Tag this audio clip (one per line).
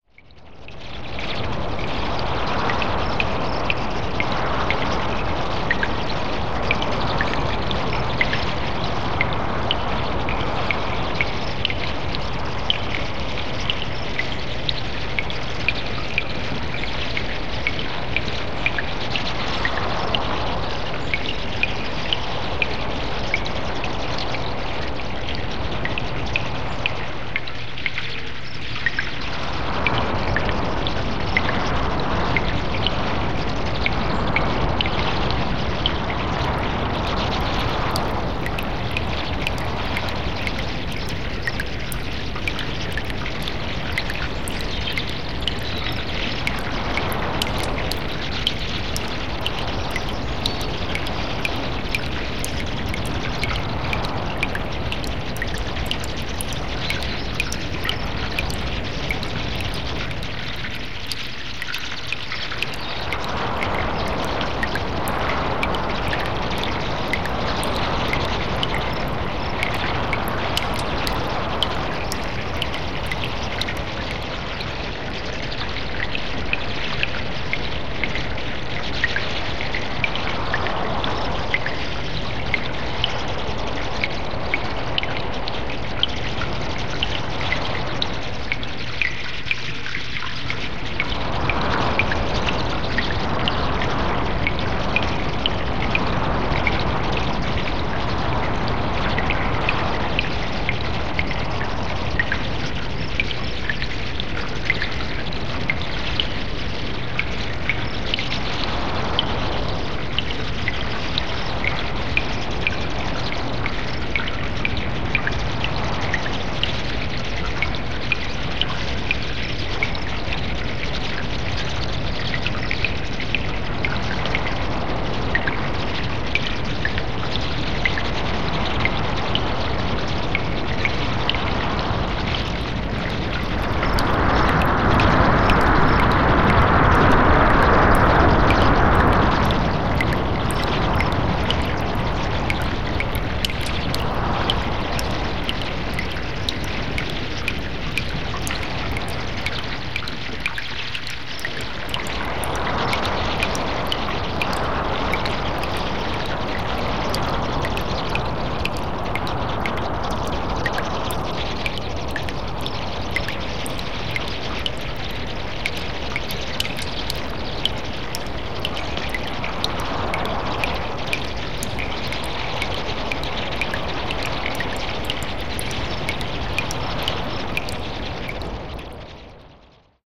dungeon
cavern
cave
Halloween
bats
scary